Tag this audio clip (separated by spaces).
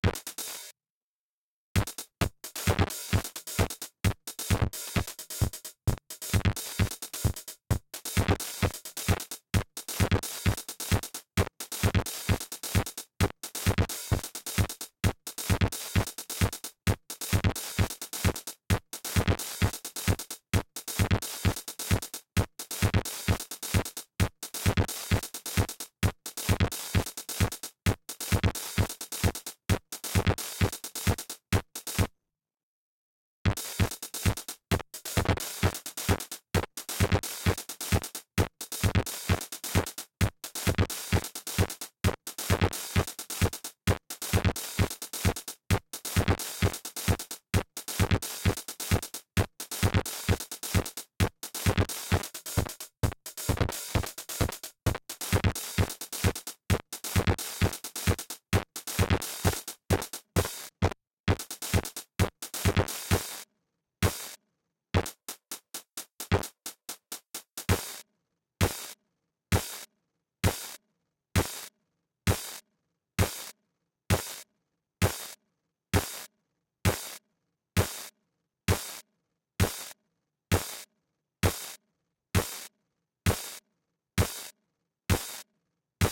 techno one bassline shot